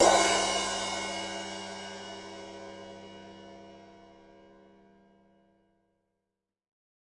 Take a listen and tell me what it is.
cymb crashlite2
a percussion sample from a recording session using Will Vinton's studio drum set.
hi, studio